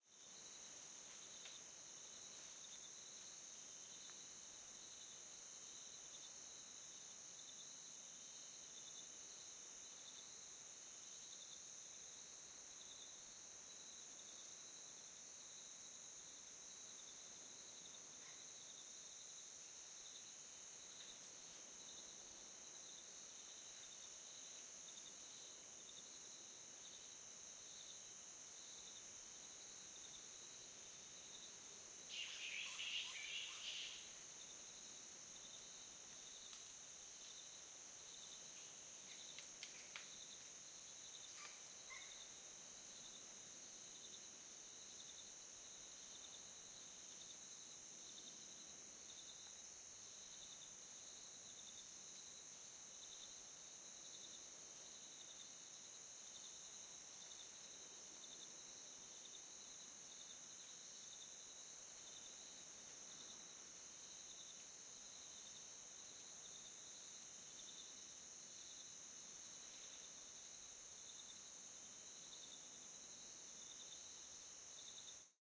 Flying Fox 3
A single Flying Fox (spectacled fruit bat, pteropus-conspicillatus) call in the middle of long periods of rain-forest night sounds. Occasional leaves falling. Soft wind in the canopy. Very quiet recording. Panasonic WM61-A home made binaural microphones - Edirol R09HR digital recorder.